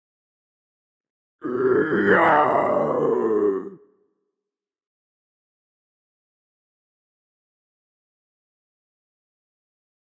Heavy metal scream I recorded, I added a little bit of reverb, did a noise reduction, and added a low pass filter to make it a bit more pretty.